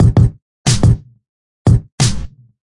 90 Atomik standard drums 01
fresh bangin drums-good for lofi hiphop
grungy, atomic, series, drums, loop, hiphop, free, sound, electro